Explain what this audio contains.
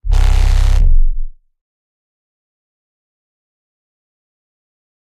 Improvized Reaper Horn
I cant remember which sound I downloaded as a base for this sound, but It was a monotone one.
I added some distortion and equalization, exported that, re-imported it and overlayed more distortion, did some more equalization and made a smooth introduction to the sound with the Fruity Fast LP filter in Fl studio. I was mainly inspired by the drones from Oblivion, the short saw-burst sound they made when they asked for identification, and of course, Mass effect reapers.